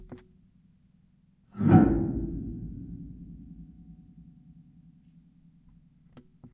piano sfx 2
Small glissando down on the piano's strings.